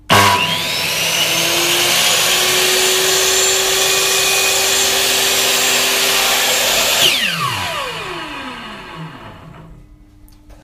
Long Saw
Circular saw starting up and stopping.
circular, industrial, machine, Saw